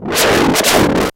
an angry synthesized dog and cat going at it.
TwEak the Mods
acid, alesis, ambient, base, bass, beats, chords, electro, glitch, idm, kat, leftfield, micron, small, synth, thumb